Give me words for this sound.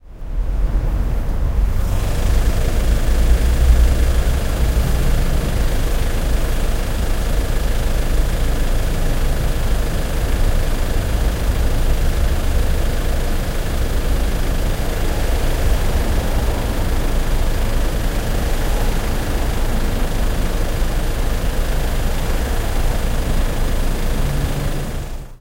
0049 Car engine
Car engine and traffic in the background.
20120116